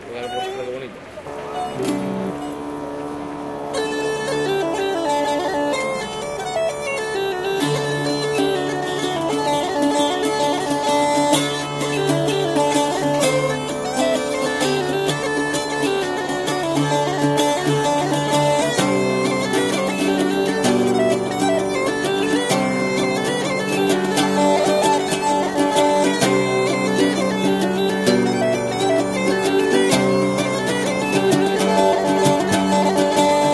20071209.reel.start
the beginning of a traditional reel played in street performance by two guys with the uilleann pipes and bouzouki. As I had requested permission to record one of them says in Spanish 'let's play something nice'
traditional, bouzouki, uilleann-pipes, reel